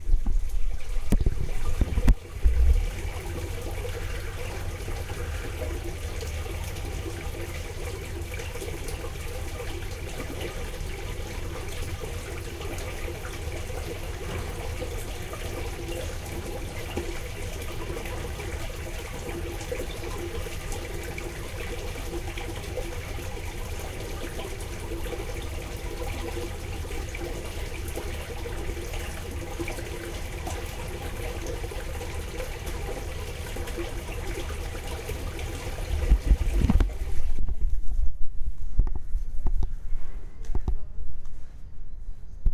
Sounds inside manhole cover
The sounds inside a manhole cover from Üsküdar İstanbul